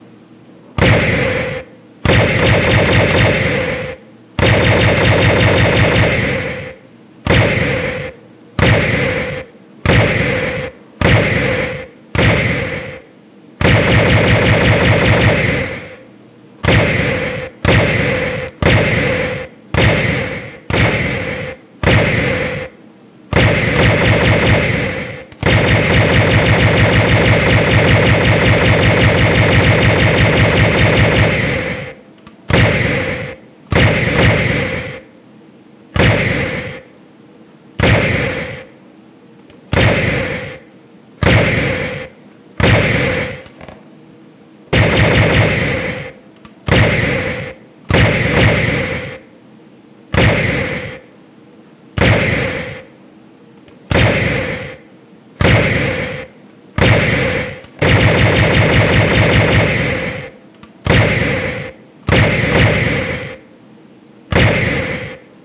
Punchy Laser Fire
A loud, punchy laserfire sound effect.
Created using a generic toy laser gun and heavy pitch moulization in Audacity.
Laserfire, Lasergun, Lazer, SciFi, Sound, hi-tech